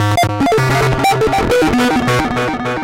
Busted Acid Noize 06
These short noise loops were made with a free buggy TB-303 emulator VST.